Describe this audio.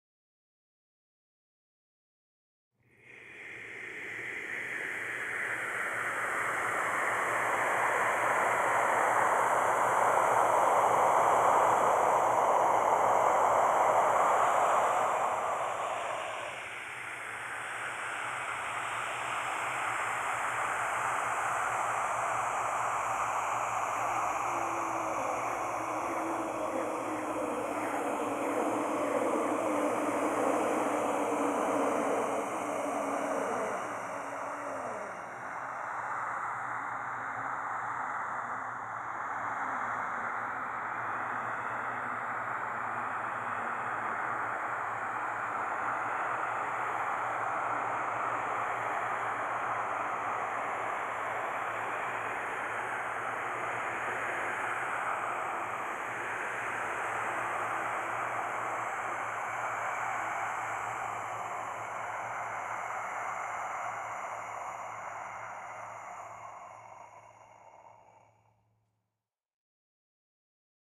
shadow breaths galm ms 10-50-55 st
Compilation of (processed) whispers, breaths and synths to obtain short audio-fragments for scene with suspense in a flash-based app with shadows.
air, breath, horror, processed, shadows, tension, whispers